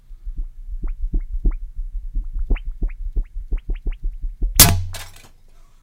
This is a blank CD wobbling then breaking.
plastic, break, wobble, strange